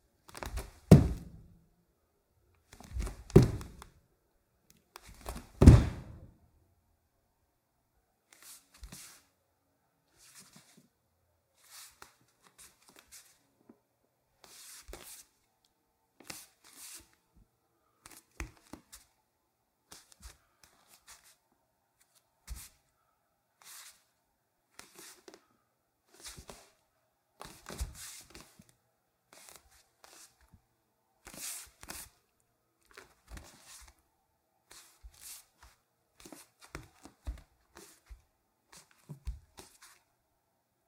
01-31 Footsteps, Wood, Barefoot, Jumps & Scuffs
Jumping barefoot and scuffs on a wood floor